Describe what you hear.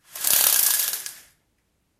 window-blinds-raise-02
Raising window blinds.
slide, sliding, curtain, squeak